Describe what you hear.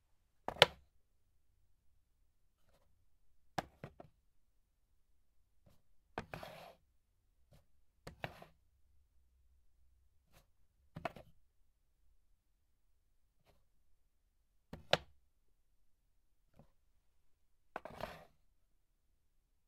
Wooden frame handling
detail, handling, interior